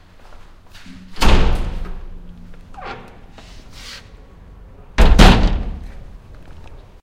slamming of a glass and steel door. Recorded with cheap Aiwa omni stereo mic and iRiver iHP120. Edit: as of November 2008 this door - and its noises - no longer exist. It has been replaced by a extremely quiet door. Miss the noisy one... / portazo de puerta de hierro y cristal